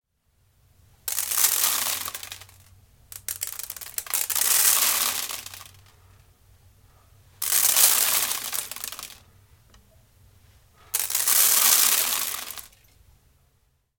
small amount of rice grains poured on a standard bowl
grains, rice, rice-grains, pour